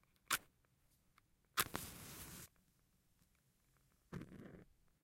Lighting a match.Recorded with a Zoom H5 with an XYH-5 Stereo mic.